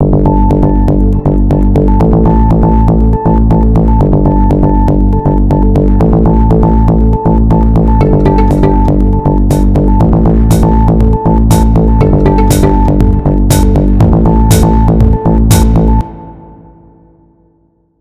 Created in LMMS. Sine loop that is catchy. Use at your disposal.
loop, reverb, sine